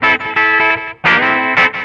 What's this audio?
fun funk live